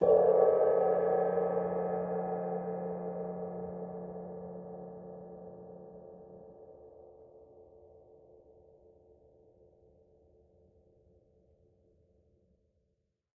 Single hit on an old Zildjian crash cymbal, recorded with a stereo pair of AKG C414 XLII's.